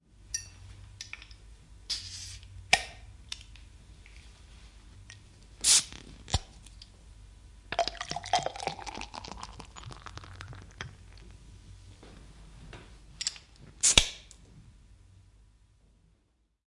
Korkki auki, kruunukorkki / Crown cap, bottles open, pouring beer, interior
Muutama pullon korkki auki, olutta kaadetaan. Sisä.
Paikka/Place: Suomi / Finland / Helsinki
Aika/Date: 1976
Soundfx, Crown-cap, Olut, Finnish-Broadcasting-Company, Suomi, Pour, Finland, Kaato, Pullo, Korkki, Open, Yle, Cap, Yleisradio, Field-Recording, Tehosteet, Kruunukorkki, Bottle